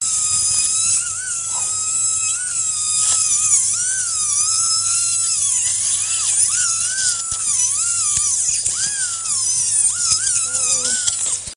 OP Bohrer 8
Geräusche aus einem Operationssaal: Drill noise with clinical operating room background, directly recorded during surgery
Klinischer, OP, clinical, Operating, surgery, usche, Operationssaal, noise, Ger, OR, Theater